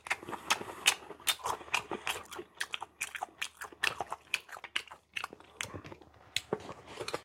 Ulsanbear eating seafoodfish1
eat, seafood, fish, eating, food